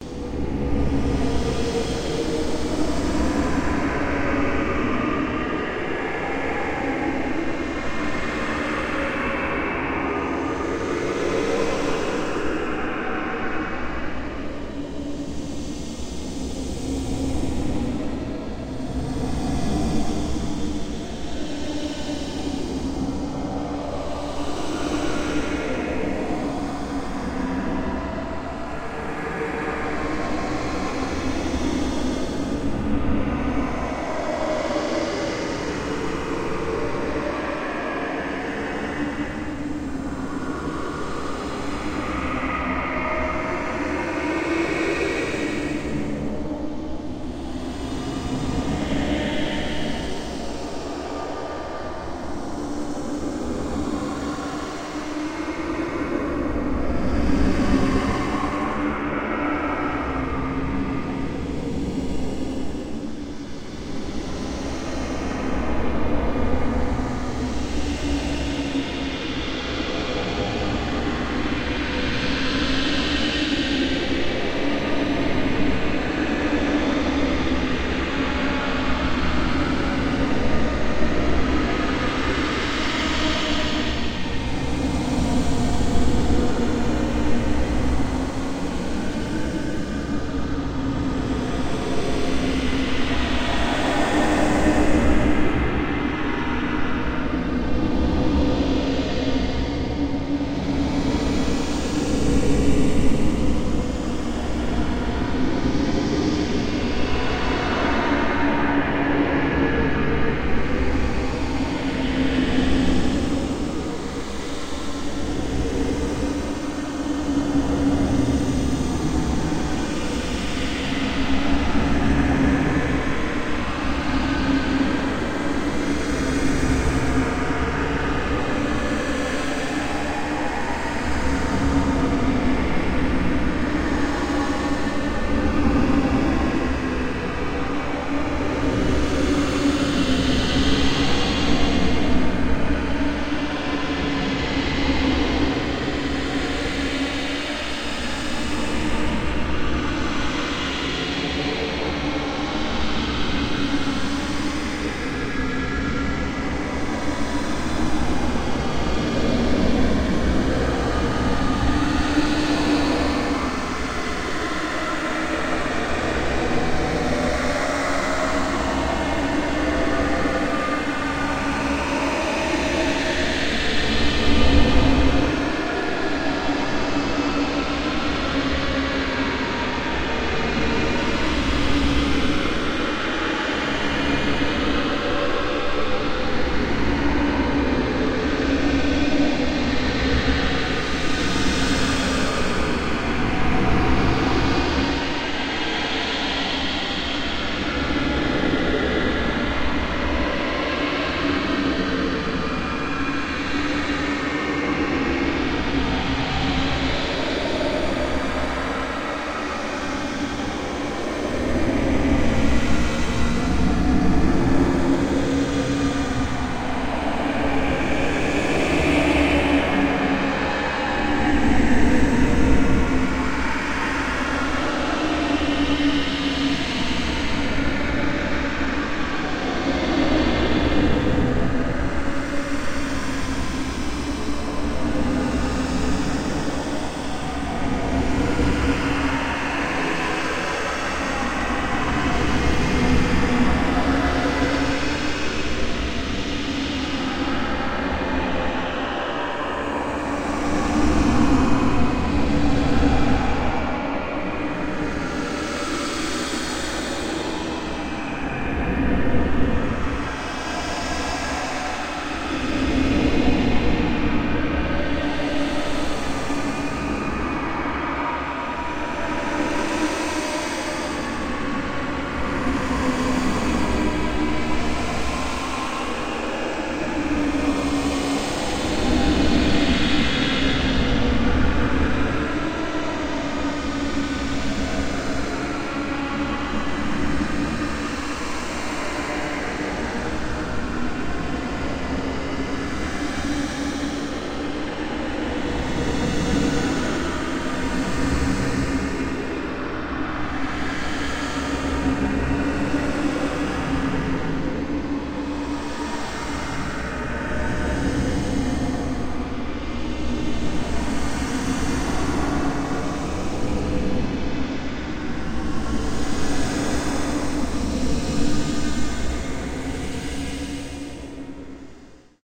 Creepy Ambient Sound
Ambient, background-sound, creepy, scary